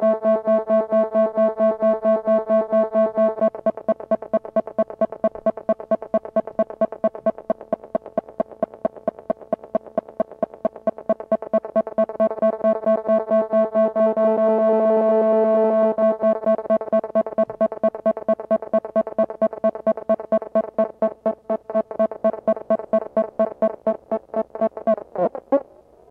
modular love 05
A rhythmic noise made from a sample and hold circuit modulating at audio frequencies. Somewhat alarm-like but weirder, particularly when it dies at the end. Created with a Nord Modular synthesizer.
digital, glitch, buzz